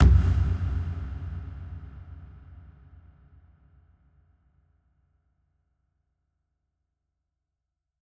Cinematic bass hit sound effect
effect, cinematic, drum, hit, bass